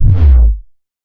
Short bass. Sounds like a low end sweep.